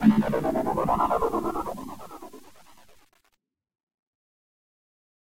90 BPM Artifical Spring Elastic - Created with iZotope Iris and based on a clap sound
This sample was created while playing around with spectral editing using iZotope Iris and is based on a clap sound. The atmomsphere created is in the name of the file.
90BPM, ambient, drone, FX, spectral, spring